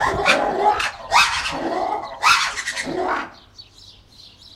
black and white ruffed lemur02
Ruffed Lemurs calling, with birds in the background. Recorded with a Zoom H2.